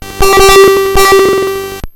These are TR 505 one shots on a Bent 505, some are 1 bar Patterns and so forth! good for a Battery Kit.
505, a, beatz, bent, circuit, distorted, drums, glitch, hammertone, higher, hits, oneshot, than